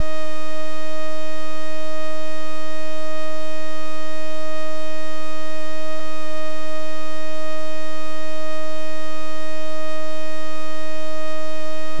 2 Osc Allround lead
Sample I using a Monotron.